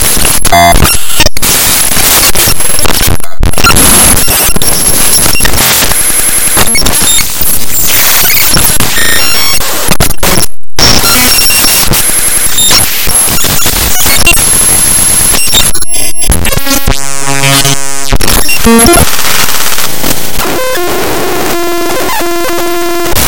Glitch Sounds
Made by importing an application using Audacity's "Import raw data" feature.